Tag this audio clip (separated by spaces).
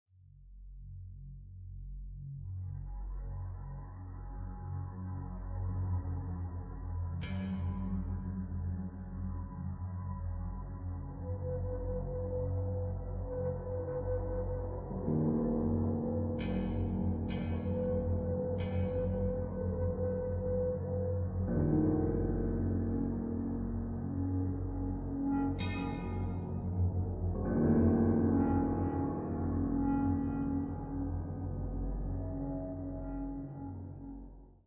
Homework Record